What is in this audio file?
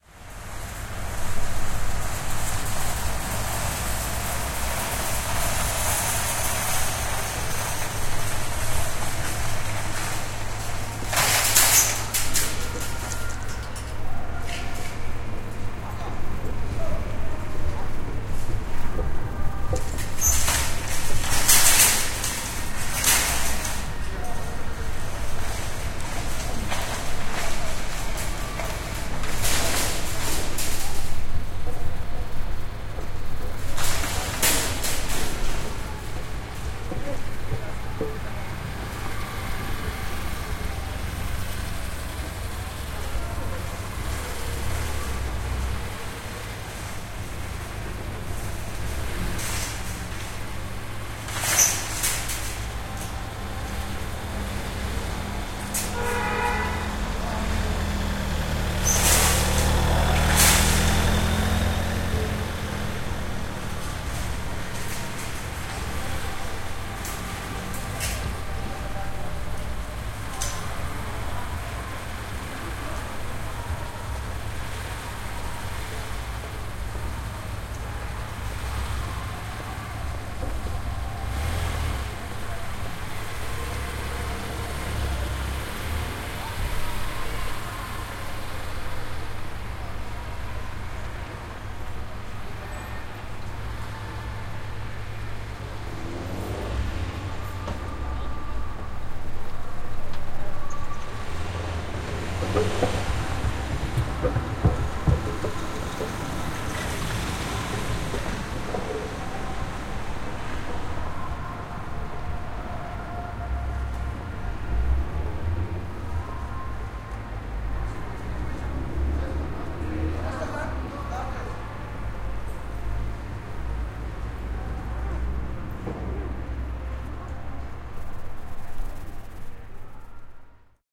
winkel centrum parkeerplaats MS
Shopping mall car parking, recorded in MS stereo
shopping, amtosphere, carparking, mall, atmo